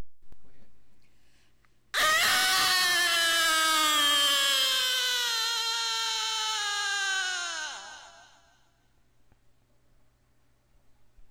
moan9 ECHO
a base moan of a woman with echo for erie and horror effect
moan, haunted, erie, moaning, horror, woman